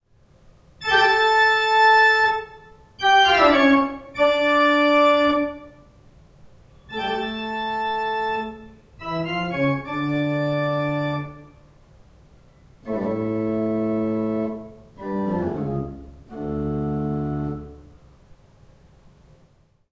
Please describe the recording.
Dramatic Organ, A
A small snippet of Bach's "Toccata and Fugue in D Minor" which I played on the church organ at All Saints, Witley, England. Classically used in horror movies, this piece pretty much became synonymous with Halloween and Dracula.
An example of how you might credit is by putting this in the description/credits:
The sound was recorded using a "H1 Zoom recorder" on 9th September 2017.
spooky, dracula, scary, dramatic, church, pipe, organ, halloween